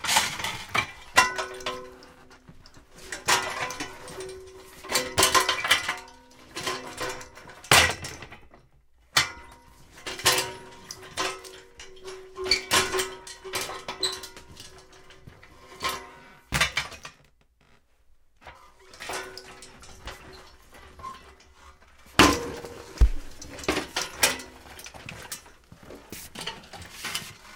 metal dolly moving banging around inside
around, banging, dolly, inside, metal, moving